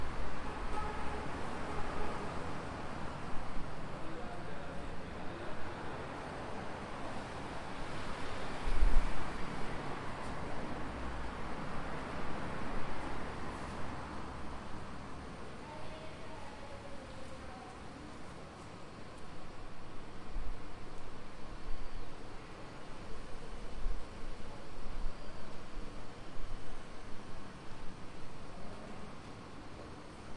14-09-16 Estacion B. Mitre Adentro
Field recording of a train station in Buenos Aires Argentina.
city, field-recording, street